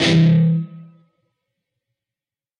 Dist Chr Eminor up pm
A (5th) string 7th fret, D (4th) string 5th fret, G (3rd) string, 4th fret. Up strum. Palm muted.
distortion, rhythm-guitar, distorted-guitar, rhythm, distorted, chords, guitar-chords, guitar